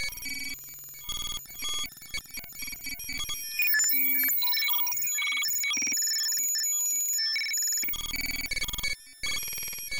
Glitchy Computer (Loop) made with VCV Rack. Blip-Blop.
Gears: Reaper and VCV Rack